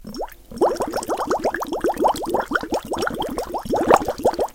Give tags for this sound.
bubbly; bubble; bubbles; drink; water; straw; bubbling

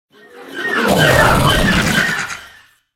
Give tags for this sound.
transformer; atmosphere; voice; Sci-fi; noise; destruction; dark; drone; rise; game; futuristic; transition; background; glitch; impact; morph; metalic; hit; horror; metal; stinger; opening; cinematic; abstract; moves; scary; transformation; woosh

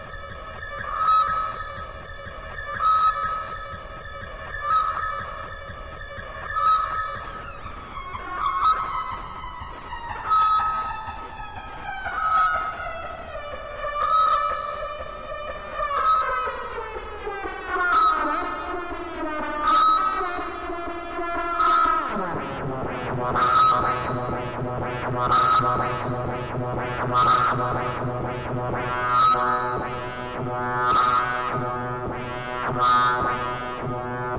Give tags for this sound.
2; 200t; 6; Buchla; Cloudlab; Emulation; Instruments; Native; Reaktor; Runs; Software; That; V1

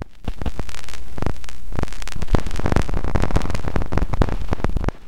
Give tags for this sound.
2-bars; noise; processed; loop; sound-design; rhythmic; glitch

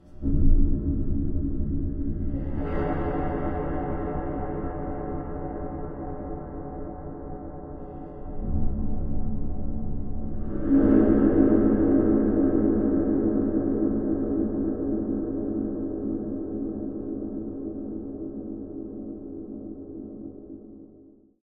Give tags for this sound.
noise; lo-fi; electronic; processed; experimental; glitch; digital

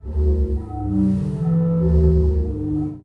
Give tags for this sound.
breathing; giant

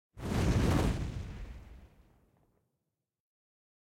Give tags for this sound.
FLASH; TRANSITION; WOOSH

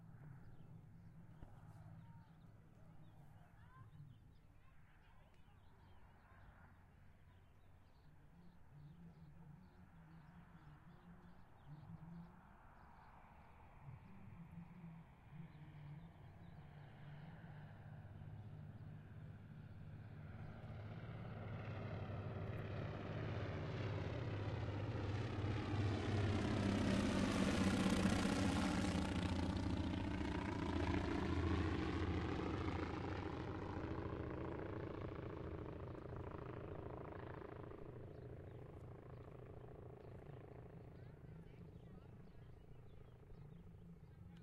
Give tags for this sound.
16bit; airplane; beechcraft; bimotor; c-45; channels; f; fnk; h4; Hz; ink; plane; zoom

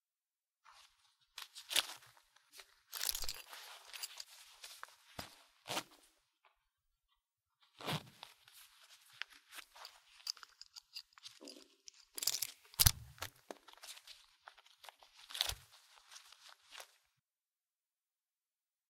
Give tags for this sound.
belt; button; zipper

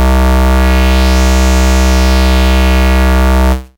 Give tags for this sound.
antti; beep; bleep; distortion; electronic; korg; mda; monotron-duo; overdrive; saro; smartelectronix; tracker

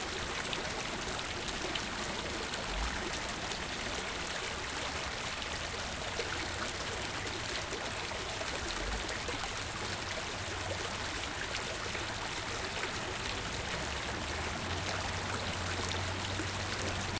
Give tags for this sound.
creek; ambient; water; nature; stream; field-recording

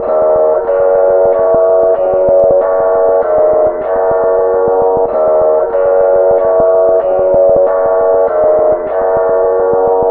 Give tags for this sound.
creepy; guitar; lo-fi; mellow

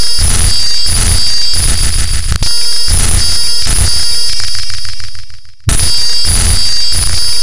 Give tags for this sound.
electronic; fubar

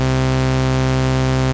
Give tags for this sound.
bass; noise; synth

